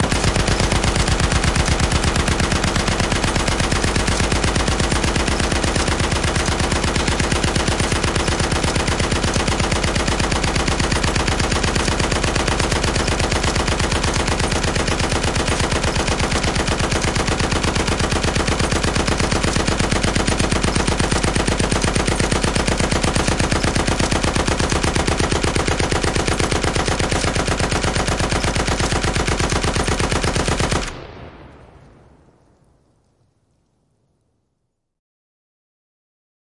Made this in bitwig, i used 17 different layers to make this. A kick drum is added before the gunshot to add punch. Sub bass is synthesized for the deep lowend, 30-50hz. All layers were selected for certain frequencies using eq and then processed together with multiband compression. Shell sounds, mechanical noises were added for more depth.
Using automation, the pitch, decay, and phase of every single layer used other than the subbass, morph to give life to the sound loop, and not sound robotic.
Even though it "might" sound real, its an illusion of sounds being played from a midi clip.
fire, firing, loop, sniper, war, fps, weapon, live-fire, gun, bullet, shooter, army, killing, rifle, gunshot, shot, technology, attack, shooting, shoot, Machine, soldier, projectile, pistol, warfare, military